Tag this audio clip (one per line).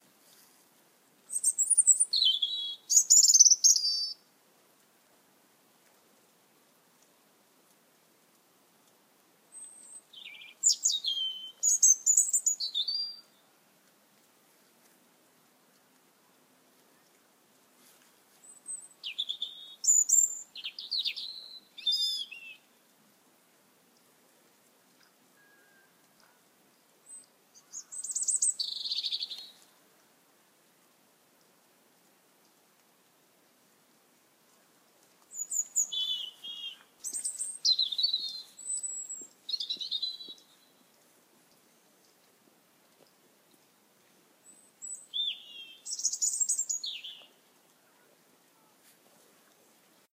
bird-singing
birdsong
chirp
tweet